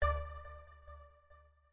Bip for forum's chatbox